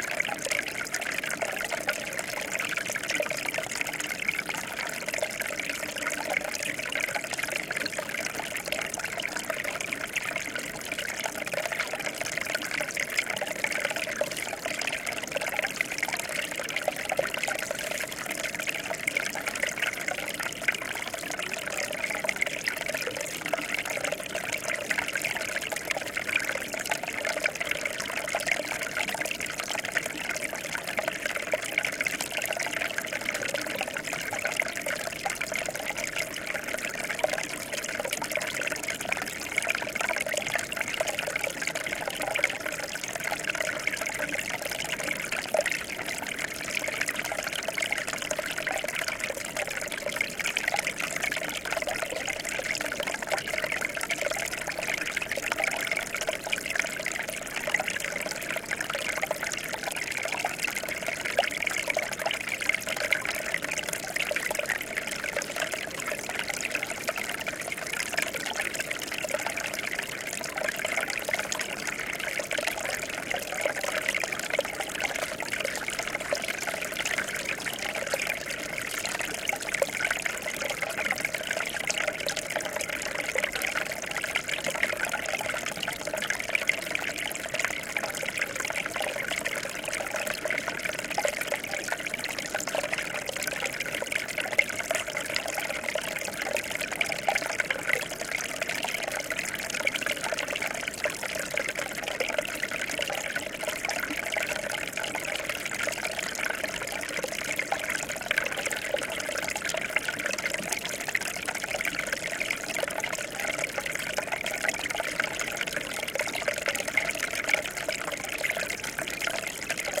trickle of water recorded on retreating Solheimajokull glacier, Southern Iceland. Shure WL183, FEL preamp, Edirol R09 recorder